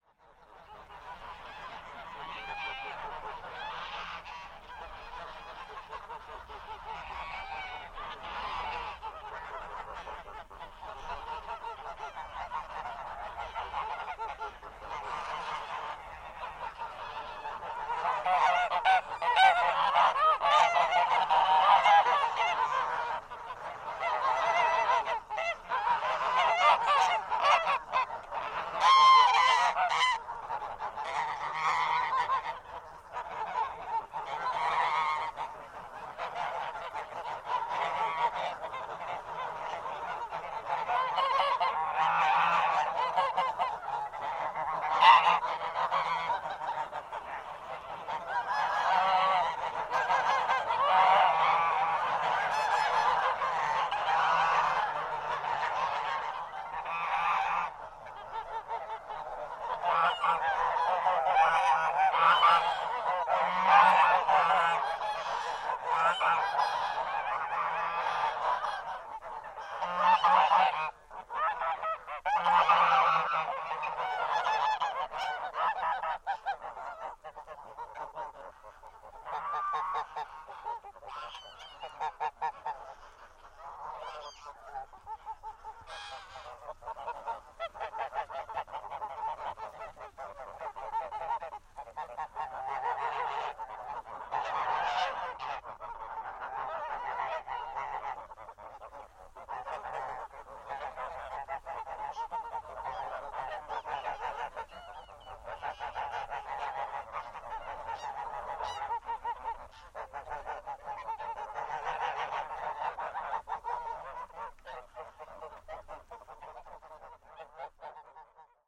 Geese Horde Honk
Big geese horde screaming, exterior, recorded at Kuhhorst, Germany, with a Senheiser shotgun mic (sorry, didn't take a look at the model) and an H4N Zoom recorder.
rural, farm, violent, crayz, countryside, geese, goose